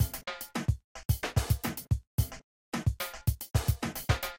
Vexst SoFunky 11OBPM Sans Jungles Snares
A beat with a very funky bassline. Just fooling around more with VEXST's wonderful snares.
beat, funky, loop, mix